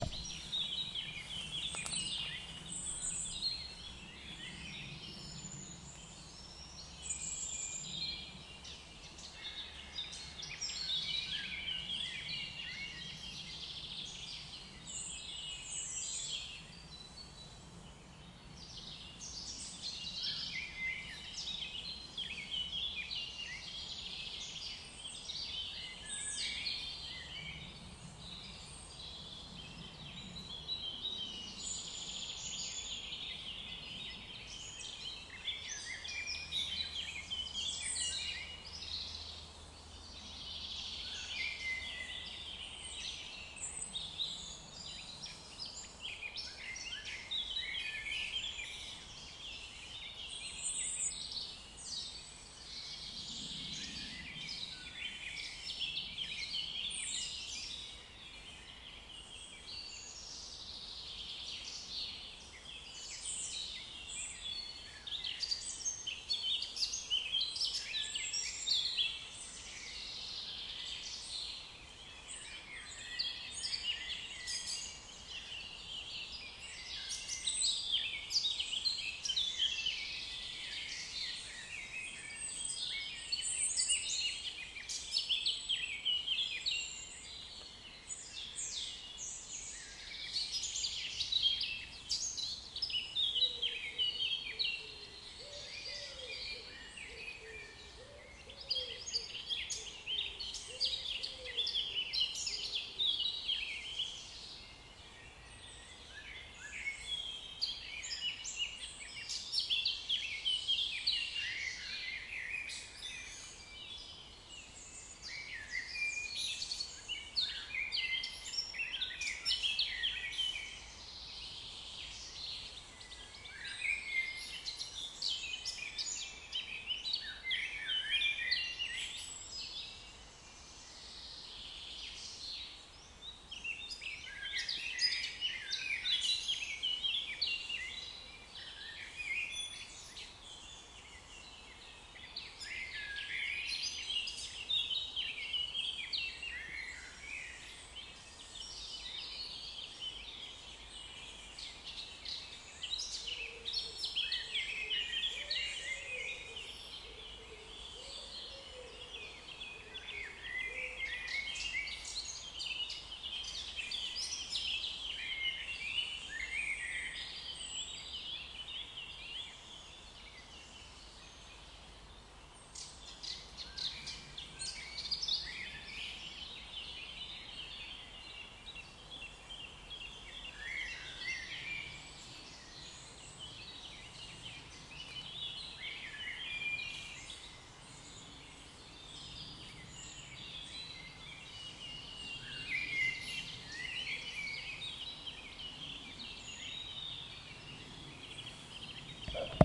forest-birds1a
Surround sound: Background noise from a central european forest, mainly birds, rear channels
4channel, birds, fieldrecording, forest, nature, noise, woods